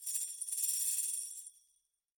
Tambo-Pattern 02
(c) Anssi Tenhunen 2012